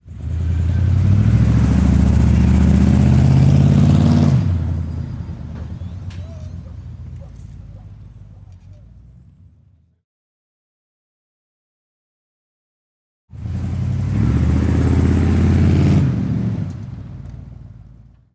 auto performance car ext big rev acceleration deceleration far drive up and stop nearby